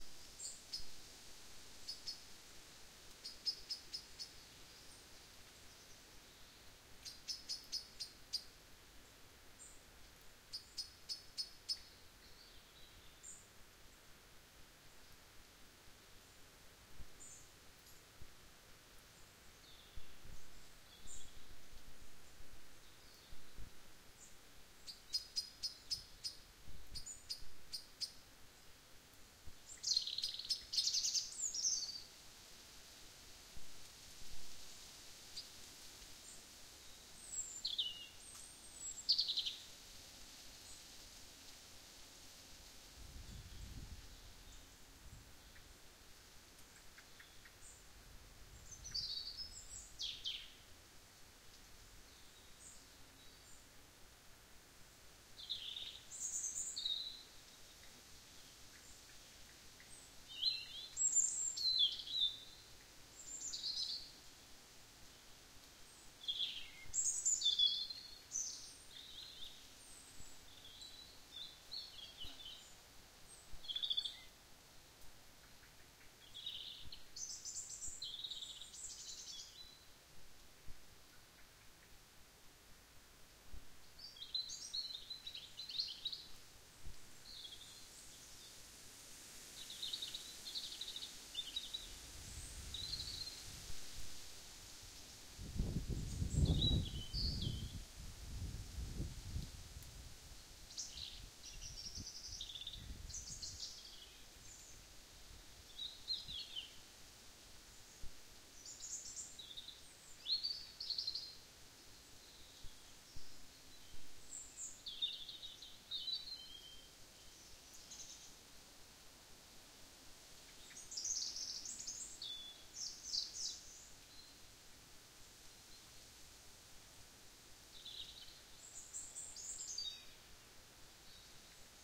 Birds twittering in forest ambiance STEREO
Birds twittering in forest and rustling leaves ambiance STEREO
ambiance, birds, forest, nature, rustling-leaves, stereo, wind